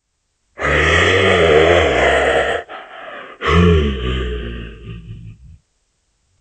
Scary: Werewolf - Voice Sound Effect
Scary sound! SFX: a werewolf or a monster.
soundeffect, werewolf, beast, sfx, voice, scary, horror, monster, creepy, haunted